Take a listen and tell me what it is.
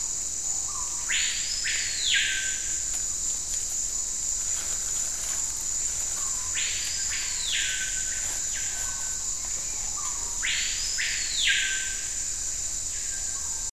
Typical bird sound of the Brazilian tropical rainforest: Screaming Piha(Lipaugus vociferans), “Cricrió” or "capitao da mata" in portuguese, familiy Cotingidae. Sony Dat-Recorder, Vivanco EM35.